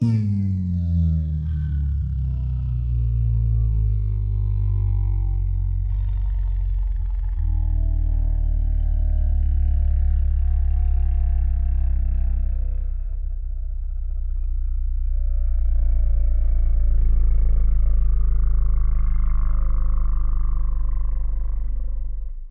This is another one of my works where I made a buzzing sound with my lips and used a preset called Powering Down with a full reverb to make like it's outside.